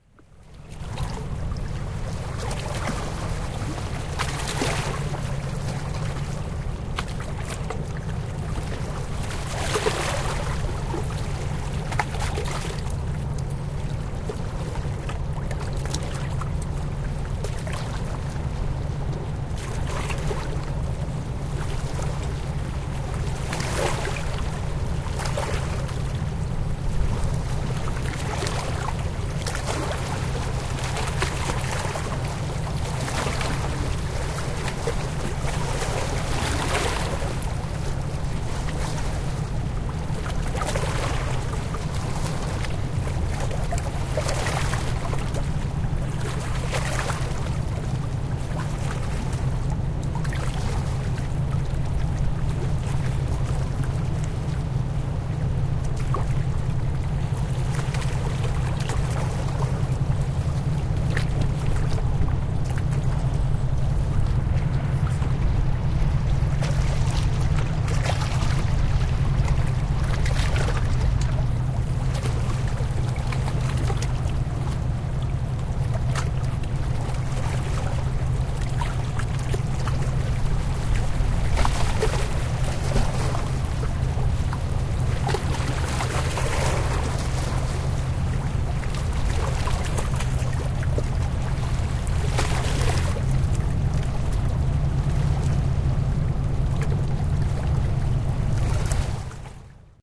eighty containers 1
About 80 sea containers moving downstream on a ship towards Rotterdam (nl). I'm sitting on the riverbank with a recording Edirol R-09.
field-recording, traffic, water, river, engine, noise, nature